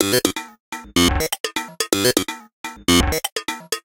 MetalicGlitchGroove 125bpm01 LoopCache AbstractPercussion
Abstract Percussion Loop made from field recorded found sounds